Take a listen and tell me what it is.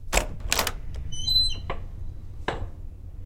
A mono recording of a fairly squeaky wooden door with a metal knocker opening. This was recorded on a Fostex FR-2 LE with AT897 mic.
wooden, opening, squeak, door, knocker